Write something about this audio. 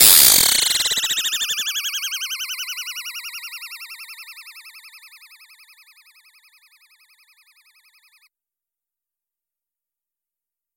Simple FX sounds created with an oscillator modulated by an envelope and an LFO that can go up to audio rates.
LFO starts at audio rates.
Created in Reason in March 2014
Reason, FM